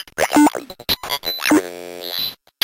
MACHINE NOISES one of a series of samples of a circuit bent Speak N Spell.